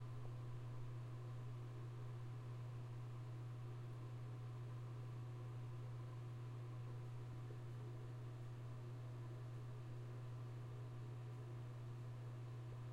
This is the sound of a bathroom fan.